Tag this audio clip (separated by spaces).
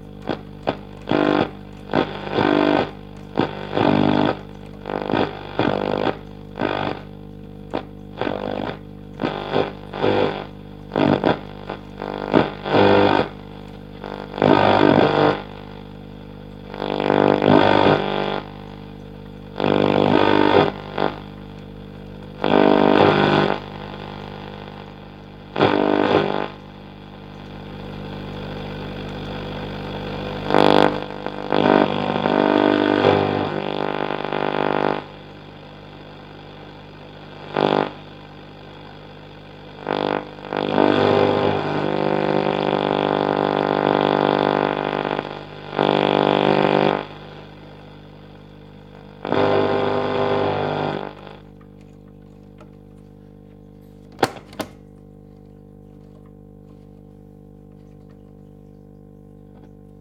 valve-radio telefunken